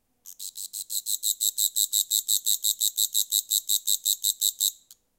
Grasshopper Singing
Singing, Grasshopper, Insect